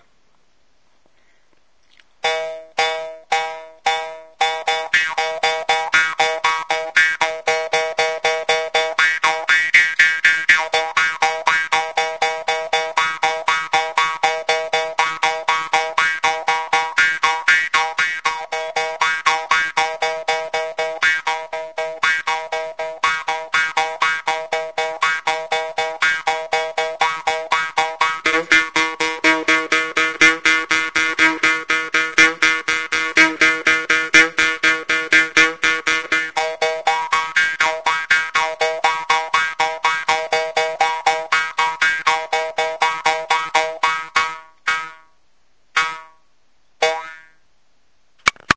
Some riffing on a small bamboo mouth harp purchased in Yunnan, China. The Pumi name for these instruments is didi or cuocuo. This one is a relatively low register, for this instrument. Recorded with TopTech TF-A27 Digital Voice Recorder